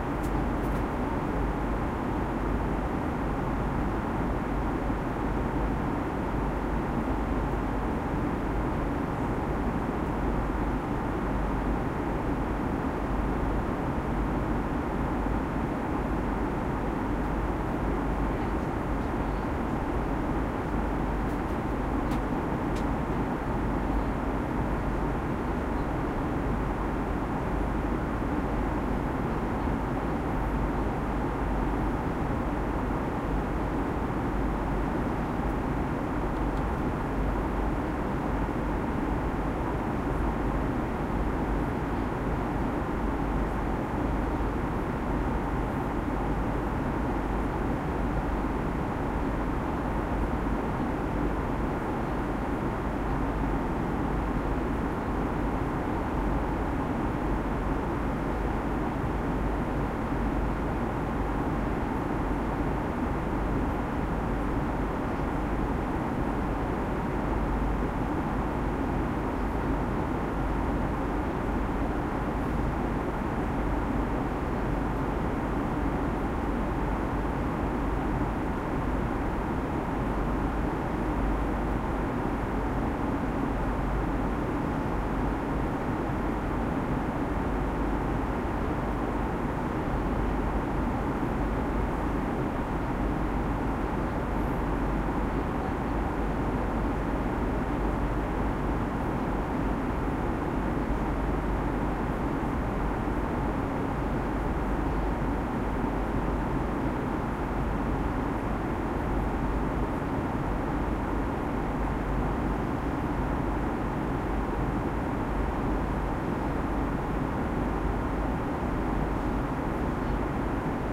AUH Inflight ambience HPON
Etihad Airways - BOEING 777-300ER Business Class Cabin - In-flight ambience 2 minutes duration during a quiet time.
Stereo recording, LOW frequencies filtered (High-pass). Edirol R09HR with Sound Professionals Binaural mics positioned on blanket over lap.